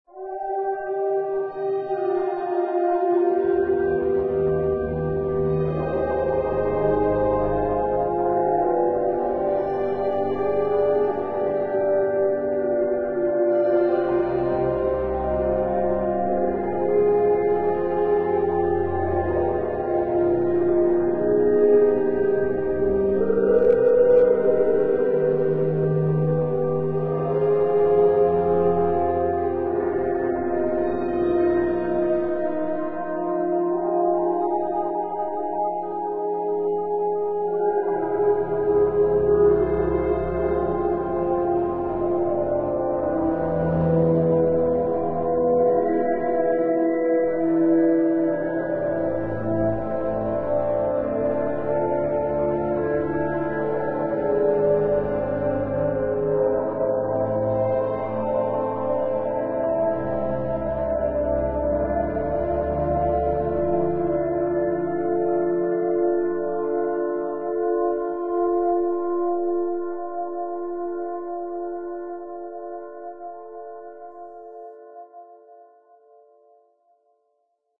Similitude of a few singers and unidentifiable instruments performing an odd tune. Compared to earlier uploads, this one adds something akin to bowed double bass and maybe a bit of violin here and there, has a cleaner reverb, etc., but it still has some places where one of the filters seems to have been overdriven a little (resulting in a small amount of distortion in one of the vocal lines). This is output from an Analog Box circuit I built. This isn't likely to all that useful to anyone except perhaps as inspiration. The circuit uses a mode of a harmonic minor, typically the 2nd or the 5th. Totally synthetic sounds created in Analog Box but finalized in Cool Edit Pro.